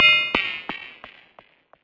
006-the bell ringmods
Computer or Mobile Chat Message Notification